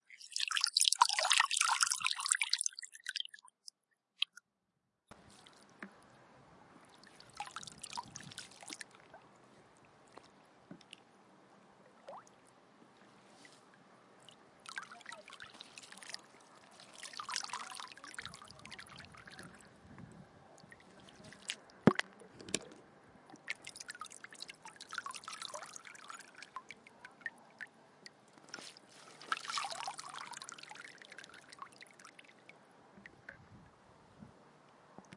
Lapping water sound
Sound of lapping water